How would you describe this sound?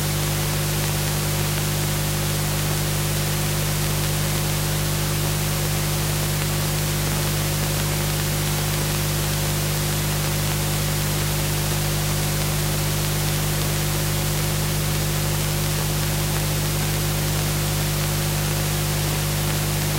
Brus KorgMS20
This is how a Korg MS-20 sounds if you don't play it.
ms-20, noise